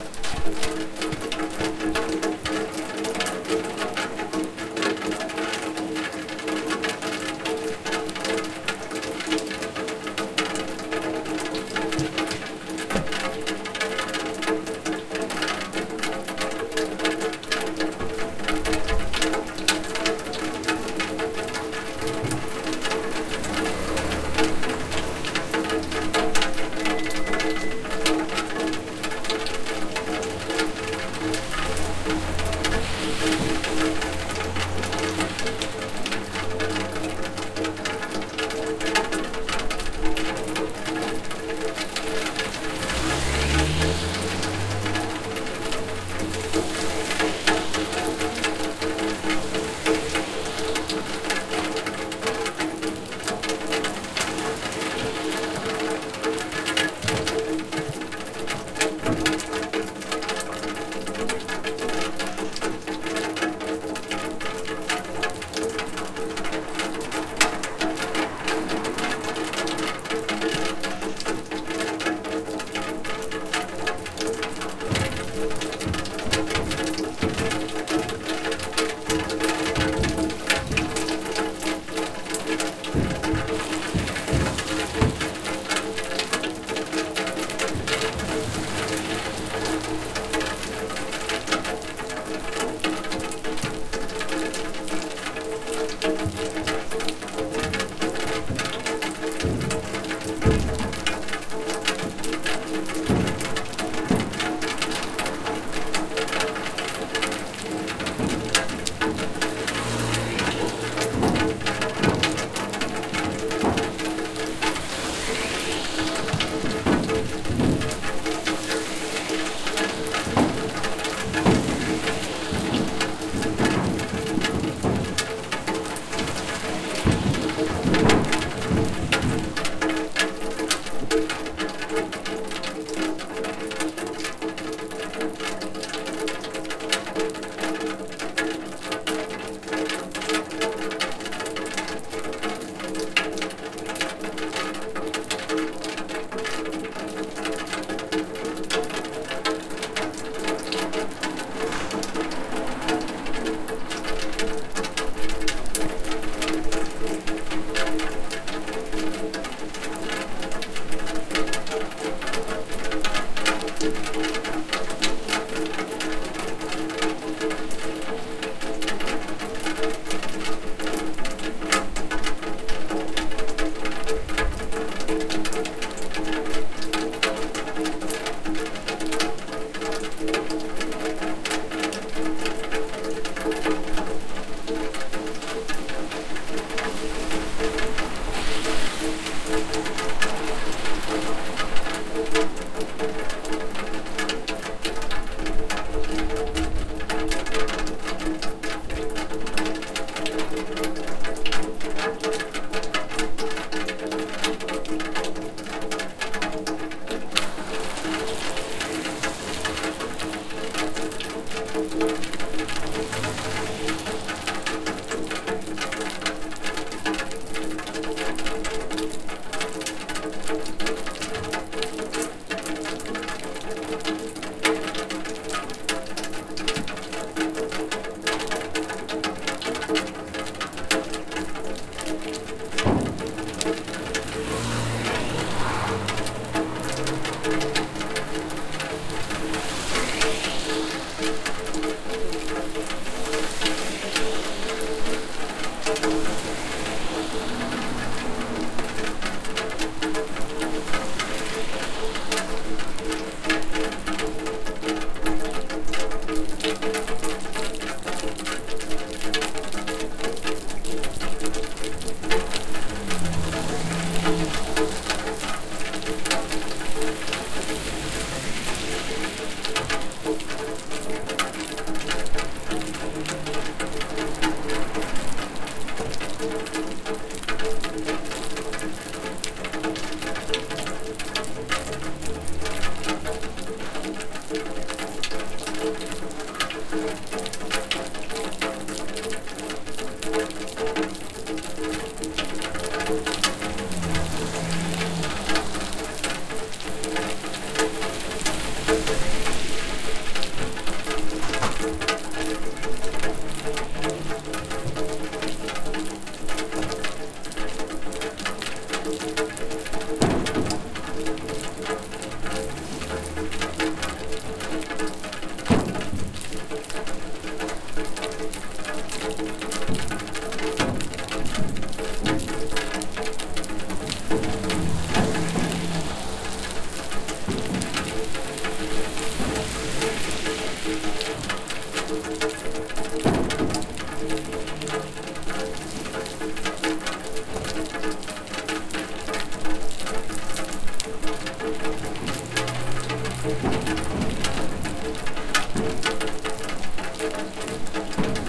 rain through rainwater down pipe. Tbilisi, Georgia Tascam DR-40x
water, city, ambient, pipe, rain, down-pipe, field-recording, rainwater